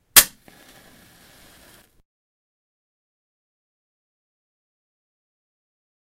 strike a match, Zoom H1 recorder
fire, glitch, H1, match, natural, percussive, zoom